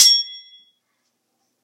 Sword Clash (56)
This sound was recorded with an iPod touch (5th gen)
The sound you hear is actually just a couple of large kitchen spatulas clashing together
clang, ding, hit, iPod, knife, metal, metal-on-metal, ringing, slash, stainless, sword, ting